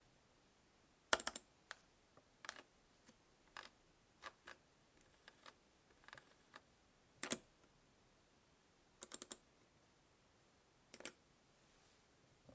5 Computer mouse
CLicking and rolling with a PC mouse.
mouse, Office, Czech, clicking, CZ, Panska, Computer